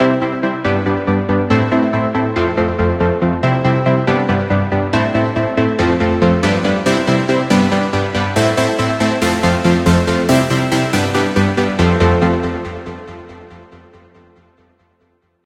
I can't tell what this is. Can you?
This one is another Bounce one, Made from the piano and some Windows Programs